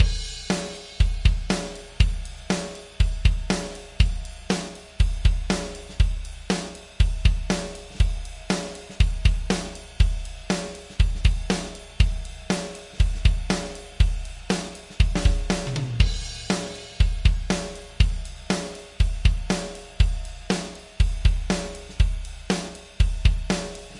Song3 DRUMS Do 4:4 120bpms
bpm, Do, beat, loop, Drums, Chord, blues, 120, rythm, HearHear